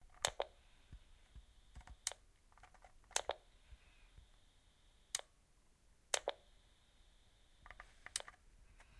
Radio Switch
The sound of a Midland 75-785 40-Channel CB Radio turning on and off, no volume.
75-785, CB, Handheld, Midland, Radio, Walkie-Talkie